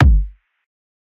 Fat Knock 2
This is a kick I made in a synth.
drum, edm, bass, beats, trap, kick, synth, rap